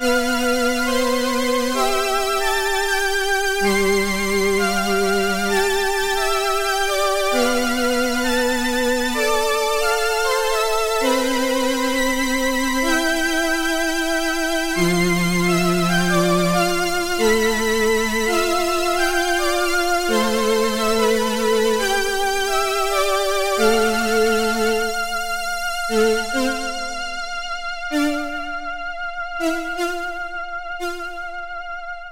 Micron Ensemble 1
Alesis Micron Stuff, The Hi Tones are Kewl.
leftfield, alesis, base, micron, chords, kat